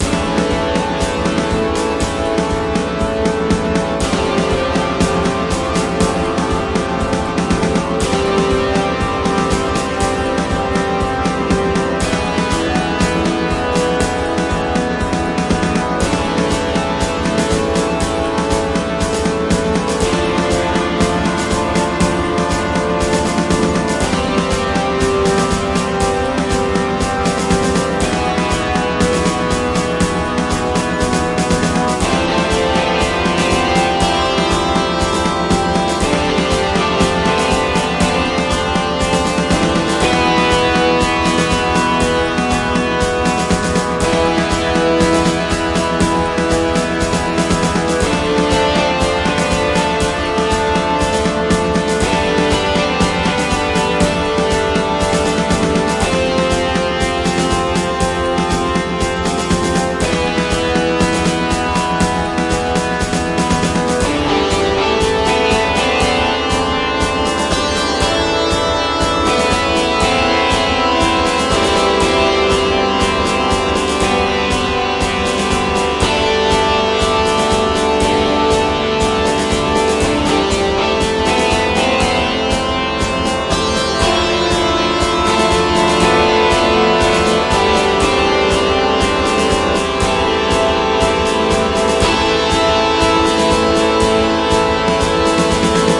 ww2 1945 action loop
A looped dynamic rhytmic action soundtrack intended for illustrating a fantasy battle in World War 2 setting. Maybe you have your own idea on how to use it!
rhytmic, agressive, epic, dramatic, brass, dynamic, film, orchestral, string, war, strings, action, background, cinematic, looped, heroic, movie, guitar, military, marching, run, soundtrack, atmosphere, loop, battle, game